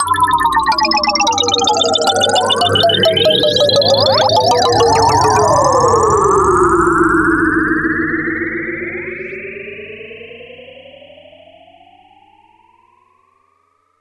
MA SFX 8bit BigTeleport
Sound from pack: "Mobile Arcade"
100% FREE!
200 HQ SFX, and loops.
Best used for match3, platformer, runners.
abstract, digital, effect, electric, electronic, freaky, free-music, future, fx, game-sfx, glitch, lo-fi, loop, machine, noise, sci-fi, sfx, sound-design, soundeffect